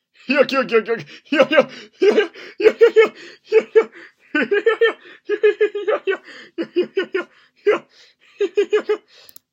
Goofy Laugh 2
Another goofy male laugh.
cartoon, comedy, funny, goofy, laugh, male